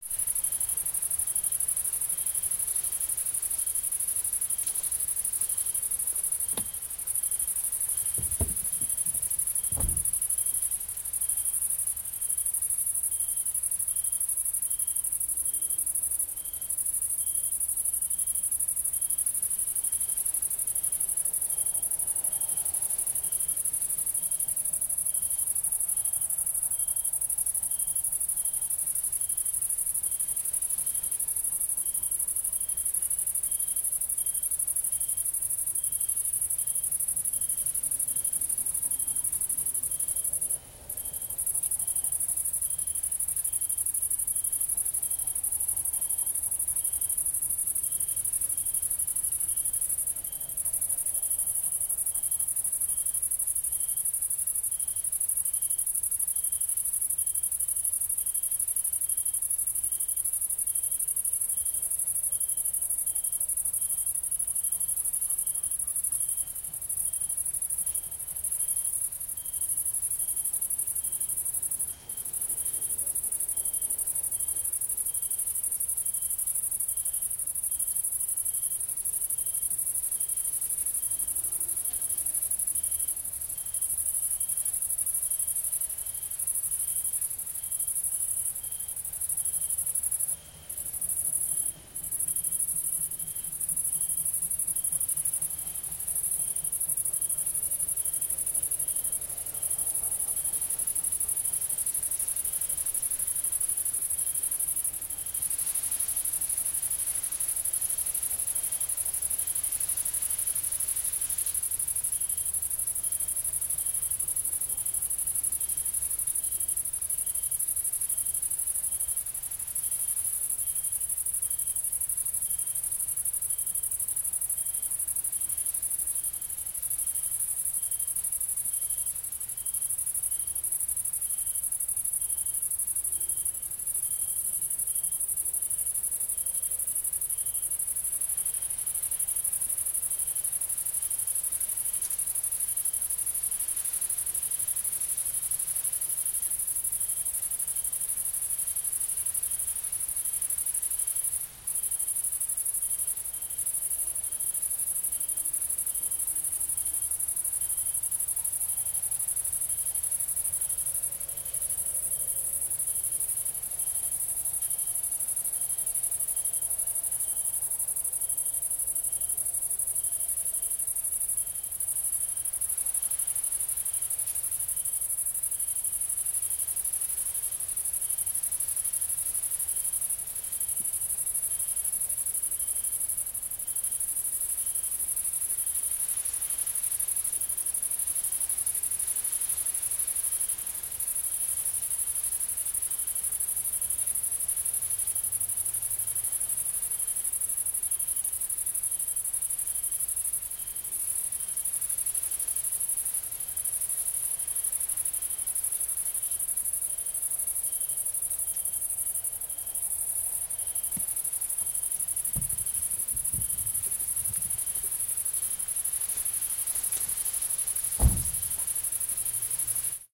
hereg hungary grain field 1 20080712
Summer night ambience, with combine harvesters far away. Recorded at a grain field near the village Héreg using Rode NT4 -> custom-built Green preamp -> M-Audio MicroTrack. Unprocessed.
cicades, combine, crickets, hungary, night, summer, weed, wind